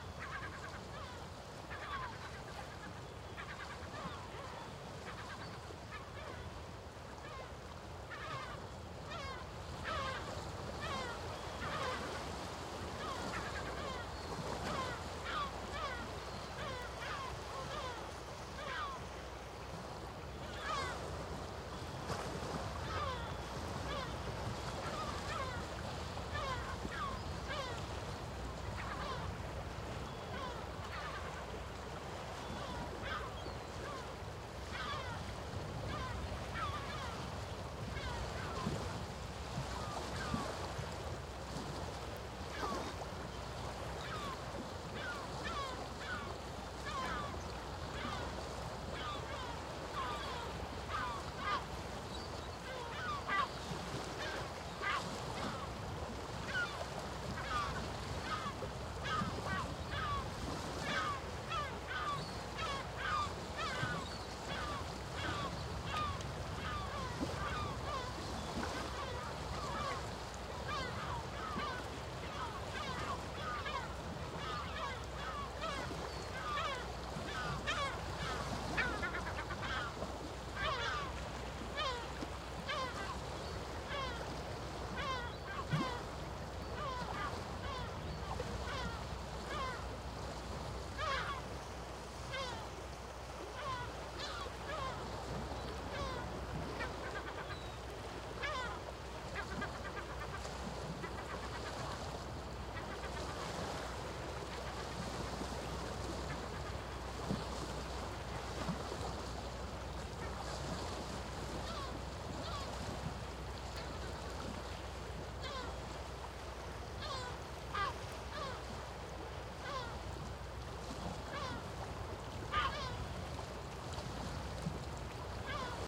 Seagulls and seashore at the magellan fjord
Nice seagull vocalizations and tranquil seawater at the end of the world.
gulls, sea, seagulls, shore, water, wind